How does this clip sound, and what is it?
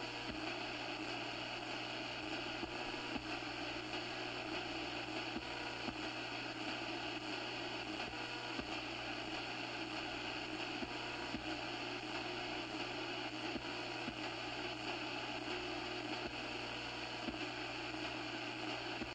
static that you would hear on the radio or tv.